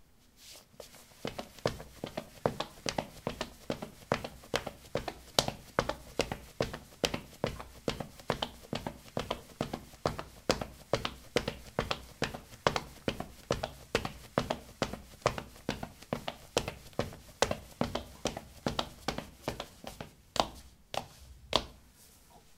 Running on ceramic tiles: sneakers. Recorded with a ZOOM H2 in a bathroom of a house, normalized with Audacity.